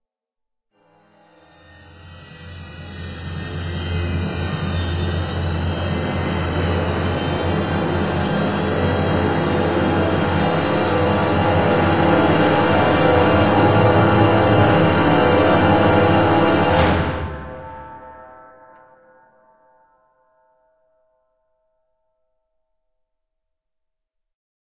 Robo Walk 05H
A giant robot taking a single step described using various instruments in a crescendo fashion.
Cluster, Suspense